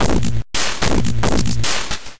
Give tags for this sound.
bertill; crushed; destroyed; free; needle; pin

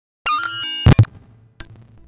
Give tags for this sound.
electronic; music; synth; squeak; squeal

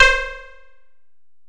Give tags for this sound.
bass; multisample; reaktor

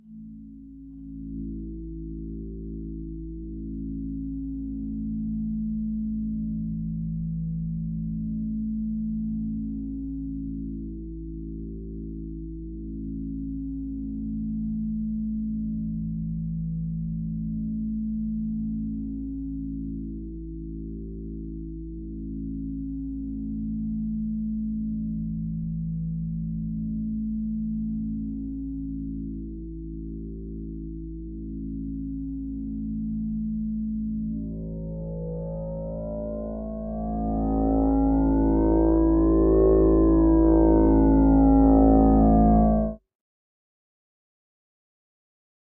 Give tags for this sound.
analog; mtg; studio; synthesis

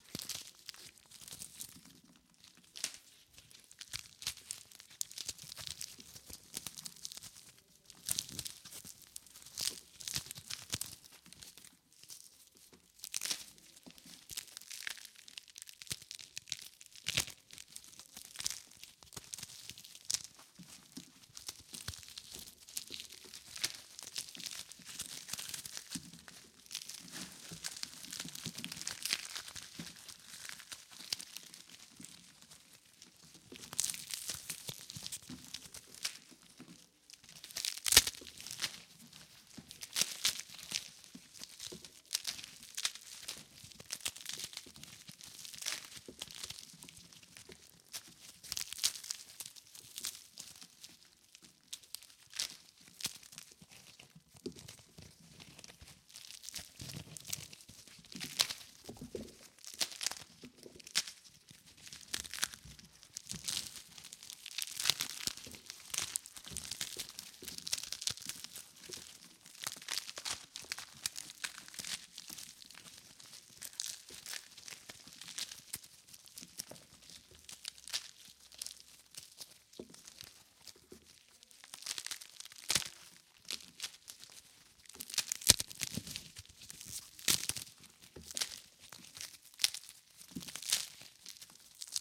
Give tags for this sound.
termitas; eating; termites; wood

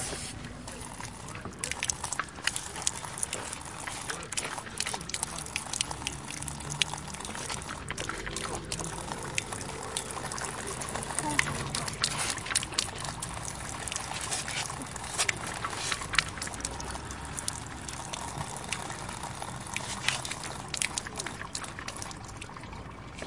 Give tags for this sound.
field-recording; Paris; snaps; sonic; TCR

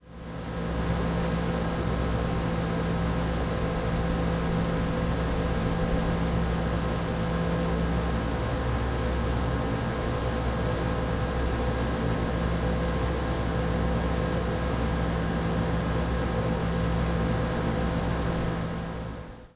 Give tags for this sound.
Air-Conditioner; Drone; Industrial; Mechanical; Rattle; Room-Tone

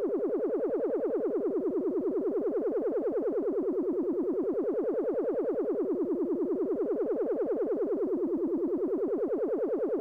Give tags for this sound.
Electronic; Korg; Space-Machine; Machine; Sci-Fi; Futuristic; Monotron; Space

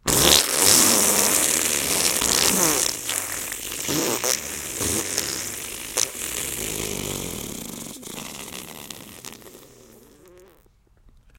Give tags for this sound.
squish; mini-fart; flatulence; wet; liquidy; squishy; fart

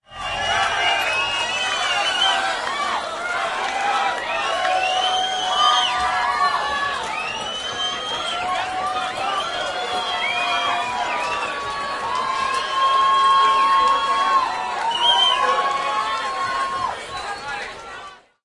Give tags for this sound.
applause; canada; crowd; gig; noise; pub; saskatchewan; saskatoon; venue

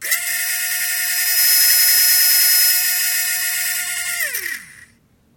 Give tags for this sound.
motor; helicopter; toy; machine; broken; gear; whir; buzz

